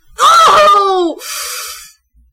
scared OHO
I recorded my voice while playing freelance horror games; SCP-087-B and Slender Sanatorium. this was so I could get genuine reactions to use as stock voice clips for future use. some pretty interesting stuff came out.
english, female, speak, woman, girl, talk, voice